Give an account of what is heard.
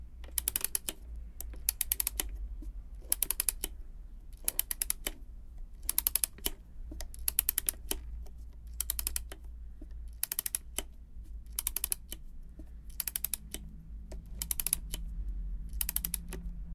Camera 16mm - Keystone Criterion Deluxe (dando corda)
Dando corda na antiga camera 16mm do Zé Pintor. Som captado na casa dele com microfone AKG C568B.
16mm, Keystone, camera, corda